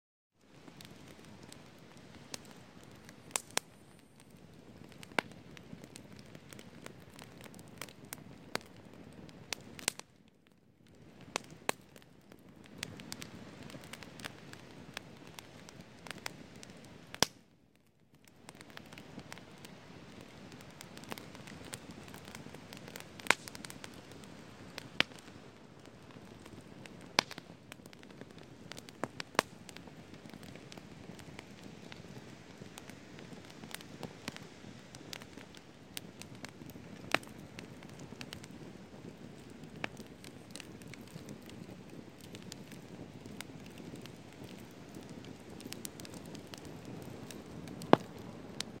camp fire
sounds of a small campfire
camp, fire, outside